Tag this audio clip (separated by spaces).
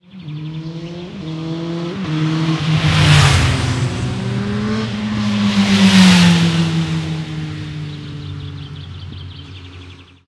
traffic passing motorcycle road field-recording purist